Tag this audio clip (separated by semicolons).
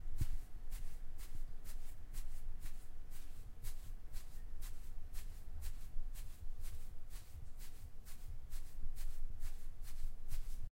pasos; pasto